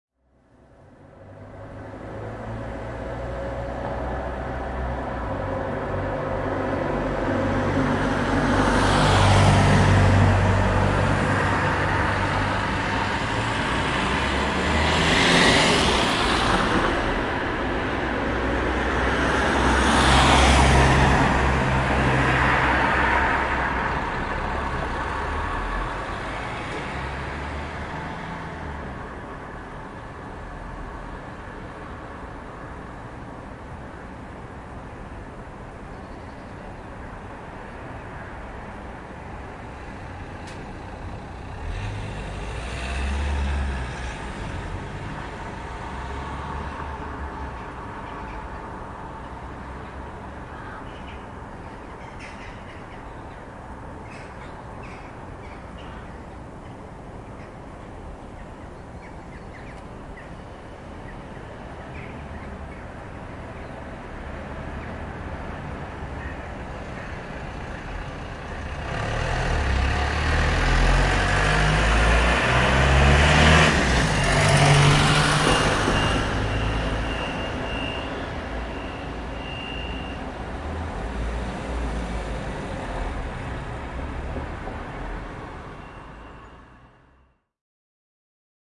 STREET AMBIANCE
Ambiance d'une rue paisible dans un centre-ville français. Son enregistré avec un ZOOM H4N Pro et une bonnette Rycote Mini Wind Screen.
Ambiance of a calm street in a french city center. Sound recorded with a ZOOM H4N Pro and a Rycote Mini Wind Screen.
city, motor, moteur, ville, street, rue, voiture, technologie, car